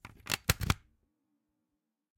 USB, plugged in
USB plugged into PC.
Computer, CZ, Panska, plugging, USB